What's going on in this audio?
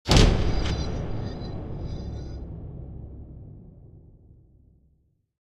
Thalisman of retribution
slam, annulet, sound, metal